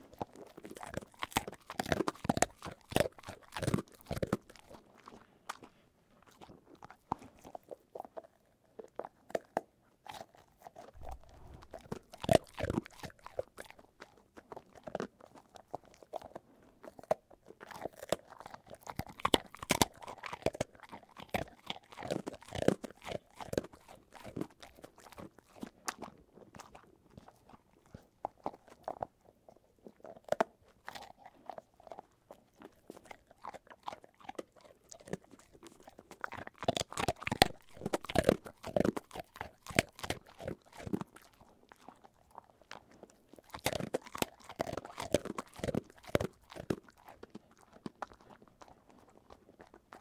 Dog Eating Milk-bone
Medium-size dog eating a Milk-bone.
crunching, dog, eating, milk-bone, pet